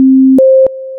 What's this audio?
generated sound in audacity as sinusoid 558Hz - 0,8dB for 5 sec. 2 effects applied on the sound: decreasing the speed at the beginningand changing the amplification at the end